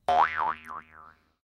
jaw harp2
Jaw harp sound
Recorded using an SM58, Tascam US-1641 and Logic Pro
bounce, harp, funny, jaw, twang, silly, doing, boing